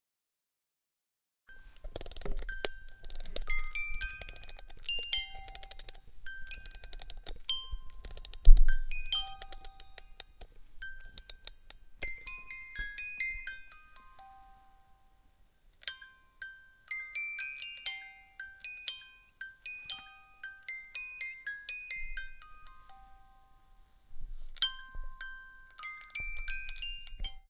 MrM MusicBox BlackSwan Winding
Winding of a music box. Edited with Audacity. Recorded on shock-mounted Zoom H1 mic, record level 62, autogain OFF, Gain low. Record location, inside a car in a single garage (great sound room).